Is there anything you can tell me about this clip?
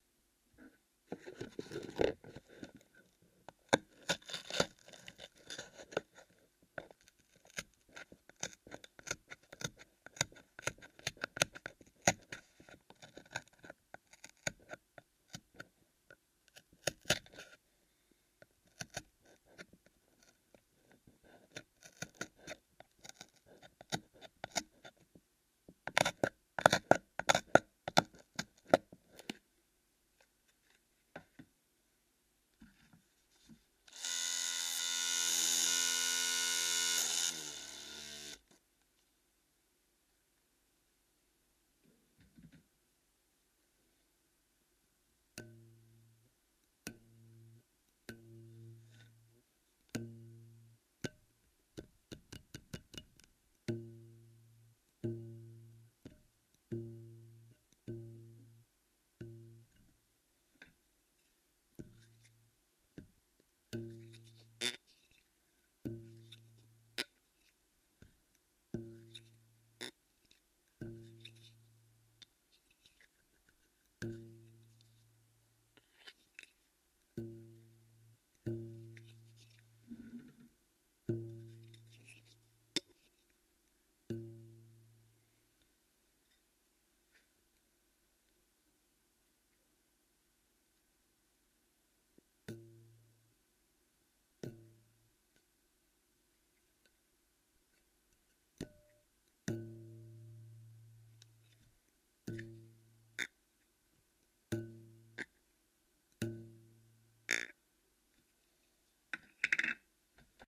A mad clip starting with scissors cutting paper, then a shaver, and finally a piler used as a soundfork